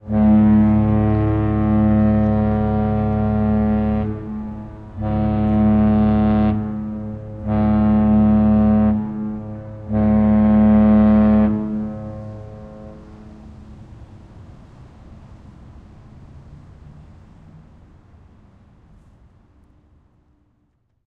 Cruise ship horn harbour Saint John 191003
Series on horn blasts from cruise ship in harbour. Stereo spaced EM172s.